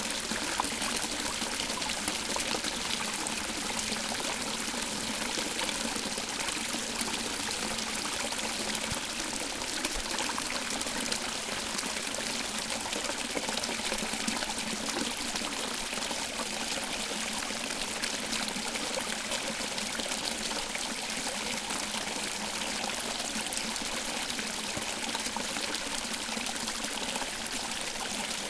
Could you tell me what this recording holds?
water trickle 2
A small stream in the forest pouring over some tree roots and dead logs with an interesting sound.Recorded with Zoom H4 on-board mics.
field-recording, flow, river, stream, trickle, water